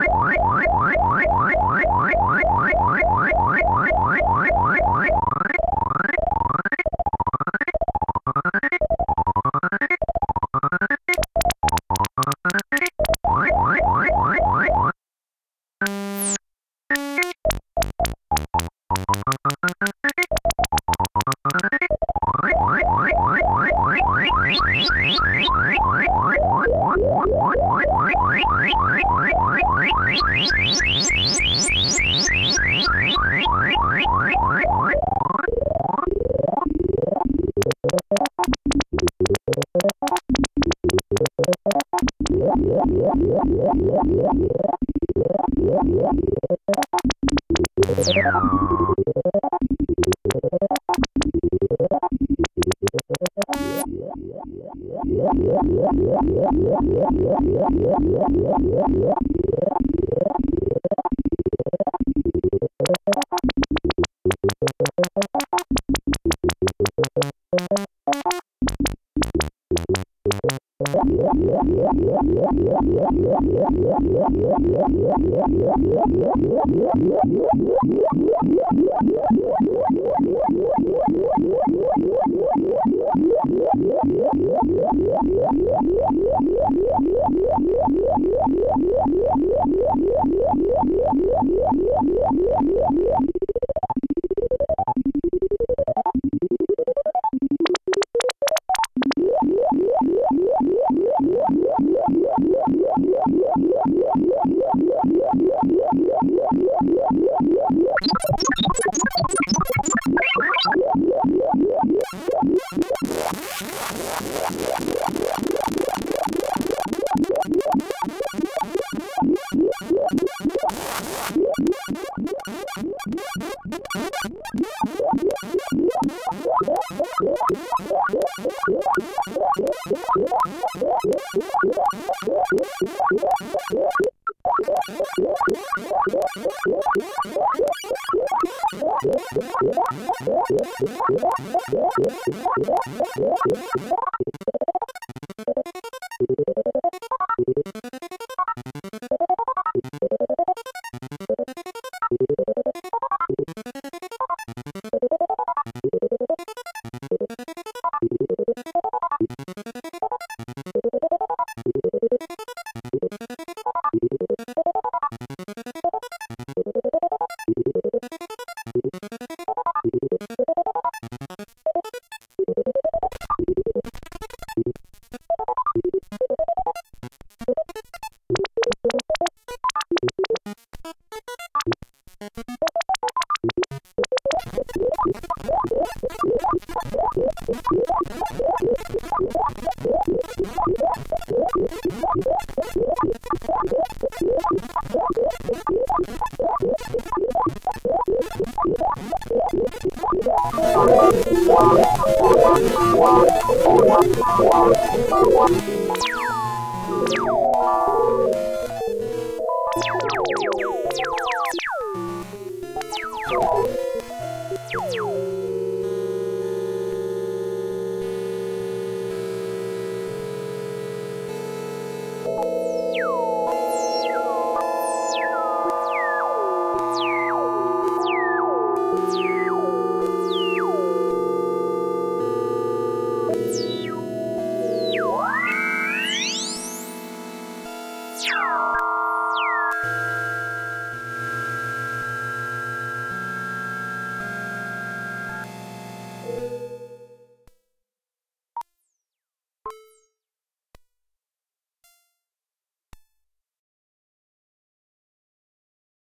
The Pacman Variations
Playing around with the Dave Smith Prophet '08 analog synth, Michael Vultoo and I ran into the Pacman arp, and we decided to make some variations on it.
sfx, prophet08, vgm, pacman, pac, retro, analogue, computer, gaming, 8-bit, vintage, analog, synthesizer, arp, blip, bleep, arcade, game, dave-smith-instruments